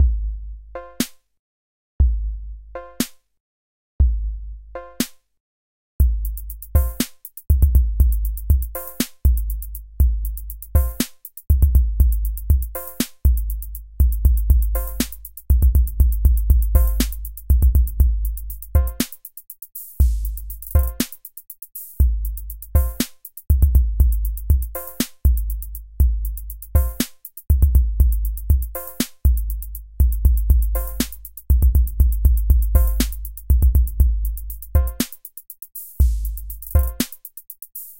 this is a ghetto beat i made for the purpose of looping, it is at 120bpm.